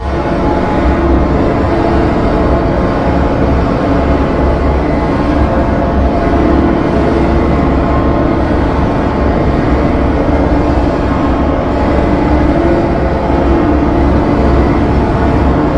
INDUSTRIAL WASTELAND 2
INDUSTRIAL, HORROR, EVIL, TERROR, SINISTER, NIGHTMARE, APOCALYPSE, DISTANT